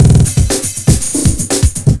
BRRRR LOOP 120BPM
A drum loop in which some of the elements "stutter" or repeat very fast. 120 beats per minute, 1 bar.